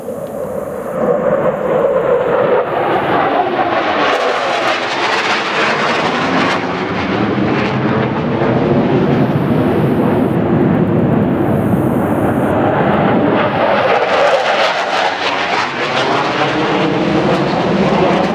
Double Jet Fly Over
A double fighter jet fly over.
aviation, fighter, Flight, flying, jet, loud, military, pilot, plane, sound